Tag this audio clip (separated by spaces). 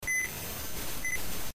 beep grocery-store scanner